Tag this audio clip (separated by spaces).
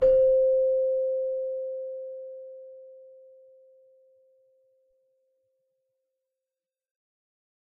bell celesta chimes keyboard